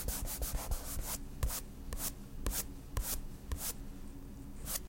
normal paint strokes 1-2
normal paint brush strokes
brush, normal, paint, strokes